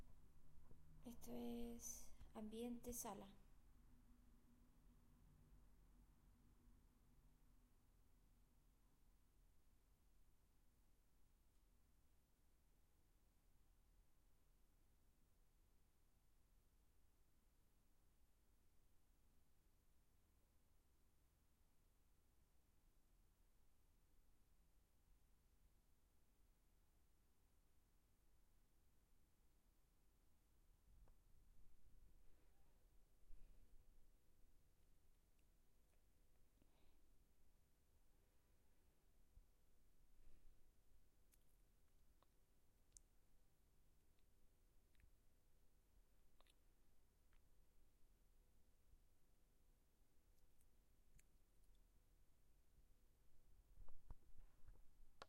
This is a roomtone of the classroom where I dropped the cup and took a sip of coffee.